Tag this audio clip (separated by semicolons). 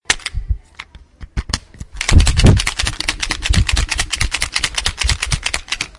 Rennes; correctionfluidpen; Bhar; France; LaBinquenais; mysounds